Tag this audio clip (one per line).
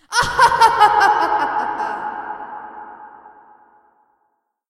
laughter
evil
female
laughing
girl
laugh
woman
cackle